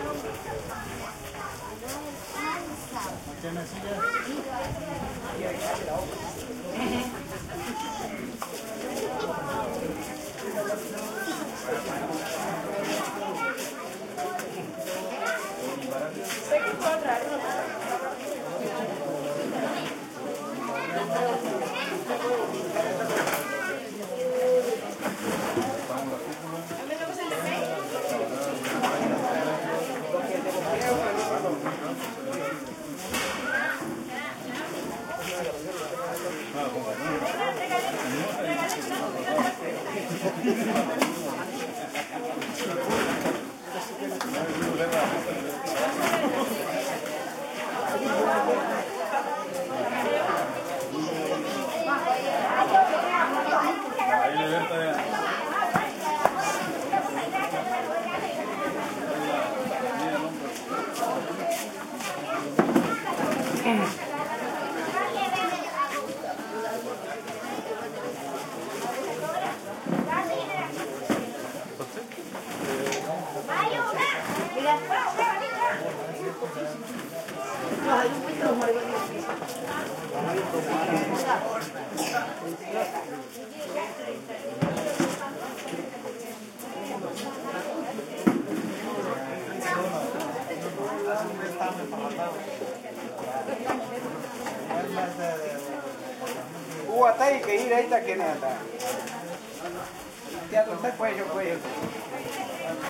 indigenous village medium crowd busy activity gathered under big hut for meeting and preparing food +cleaning and scaling fish on table left spanish voices Colombia 2016